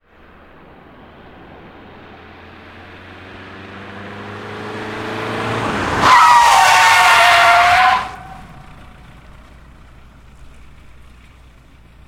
This sound effect was recorded with high quality sound equipment and comes from a sound library called Volkswagen Golf II 1.6 Diesel which is pack of 84 high quality audio files with a total length of 152 minutes. In this library you'll find various engine sounds recorded onboard and from exterior perspectives, along with foley and other sound effects.